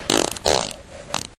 fart poot gas flatulence flatulation
fart, flatulation, flatulence, gas, poot